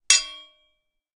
anvil strike 3
The sound of what I imagine a hammer striking an anvil would probably make. This was created by hitting two knives together and resampling it for a lower pitch.